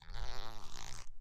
Bending a leather belt.
belt, bend, leather